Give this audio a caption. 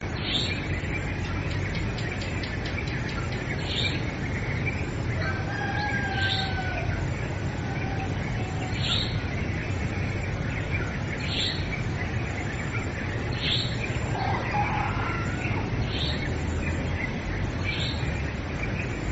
161229-Farm-Insects-Sounds-Bali
Crickets, insects & a few roosters: Sound of rural countryside close to Ubud, Bali in Indonesia.